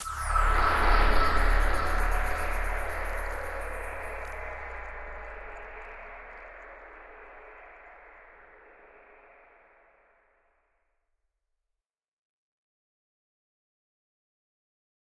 Alien Icewind 5
Tweaked percussion and cymbal sounds combined with synths and effects.
Ambience, Sound, Noise, Effect, Gas, Machine, Deep, Wind, Alien, SFX, Air, Artificial